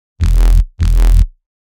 distorted-neuro-electro-bassE
i've synthesized it with dope mono synth SUGAR BYTES CYCLOP. it's simple filtered sound, but the distortion is stylish. process further !
thump, distorted, dnb, dubstep, synth, electro, tension, synthesizer, neuro, electrical, powerful, voltage, bass, techno, filtered, dirty